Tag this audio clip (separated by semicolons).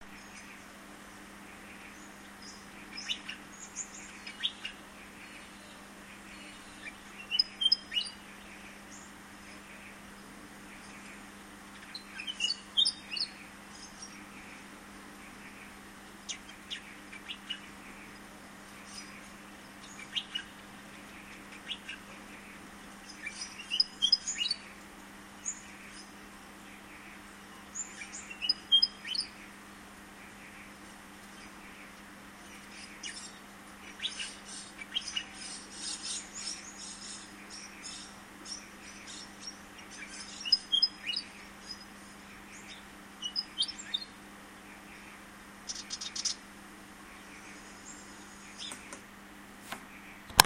Australian; forest; birds; field-recording; bird; wagtail; willy; queensland; nature; wag-tail; birdsong